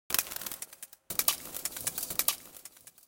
Metal Cracking 2
Glitchy cracking metallic sounds, can be used as hi-hats or many other things ;).
electric, hi-hat, sparkling, cracking, glitch, idm, ripped, noise, glitches, rhythm, ripping, sparks, metal